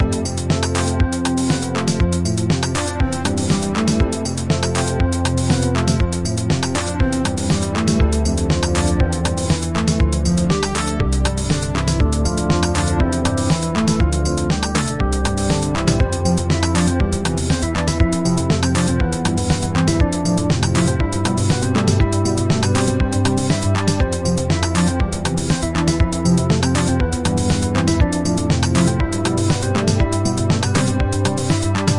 short loops 06 03 2015 2
made in ableton live 9 lite
- vst plugins : Alchemy, efthimia, Prodigious, Microorgan MKIII/5 - All free VST Instruments from vstplanet !
- midi instrument ; novation launchkey 49 midi keyboard
you may also alter/reverse/adjust whatever in any editor
gameloop game music loop games organ sound melody tune synth gameover endgame
sound, loop, gameloop, organ, game, melody, music, tune, games, synth